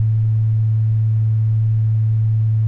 Sine wave with a very very low noise, nothing special, simply another of my weird and crazy sounds.
sine, waves, strange, noise, rancid, extraneous, weird